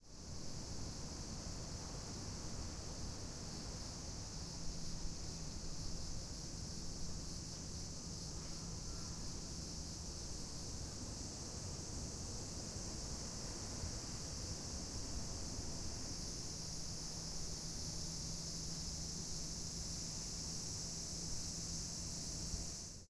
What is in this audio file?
Tokyo Cicada
Sound of Cicada at Tokyo, Summer afternoon